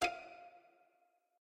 A soft pizzicato string stab.